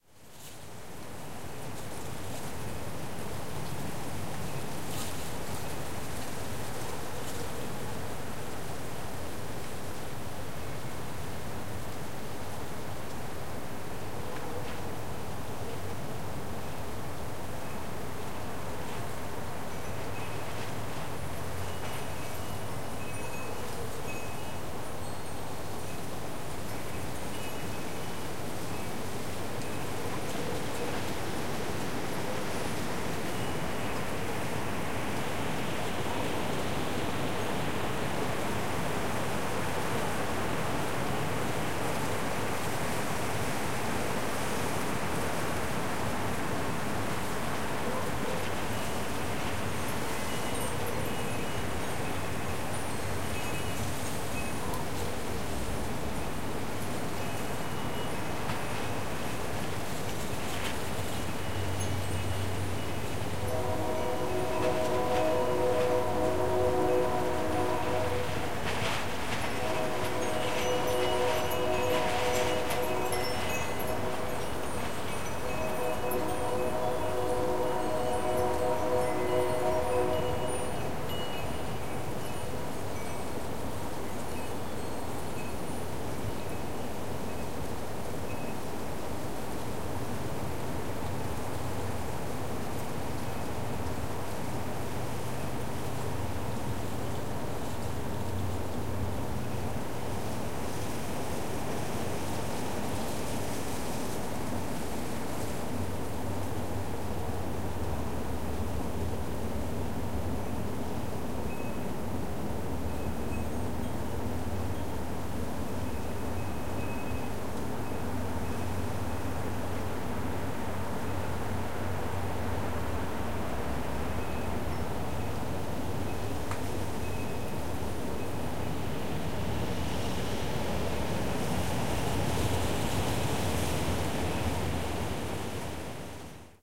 WindDecemberPlusChimesandTrain44100SampleRate
A recording of a series of northwest wind gusts blowing through the bare December branches. A wind-chime adds some color, as well as a far-off train towards the end of the recording.
Recording made on December 17th, 2014 with the Zoom H4N recorder and using its internal, built-in stereo microphones with the record volume set on 82.
lonely, empty